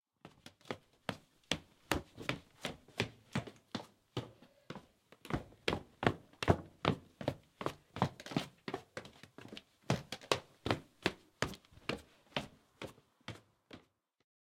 10-Man fast walking stairs
Man fast walking on wooden stairs
walk, man, Pansk, stairs, wooden, wood, footstep, CZ, fast, Panska, Czech, walking, step